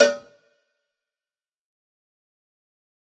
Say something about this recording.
This is Tony's nasty cheap cowbell. The pack is conceived to be used with fruity's FPC, or any other drum machine or just in a electronic drumkit. ENJOY